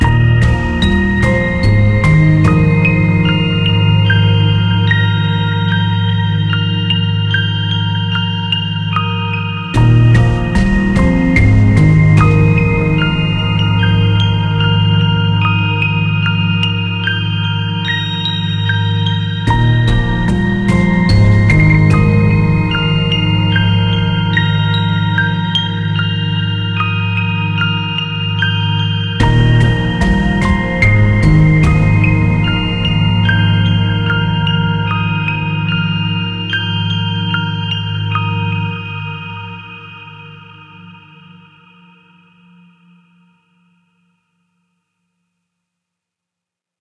"After the flu" is soothing, mellow, and full of emotion. It is suitable for use as music background in a film, in a podcast that calls for a peaceful, pleasant and emotive atmosphere, or as a stand-alone instrumental track. The music is really gentle and comforting to listen to, which is ideal for relaxation.
Thank you for listening.
You can find me on on:
Thank you for your cooperation.
Take care and enjoy this compositions!